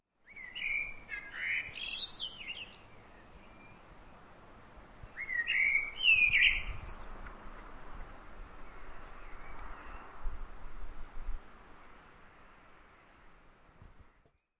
Birdsong at Equity Road, Leicester 29.04.11
One early morning I heard some birds singing on the roof of my old house on Equity Road, Leicester. I got outside just in time to catch this chap before he flew away. Such a beautiful call.
field-recording, leicester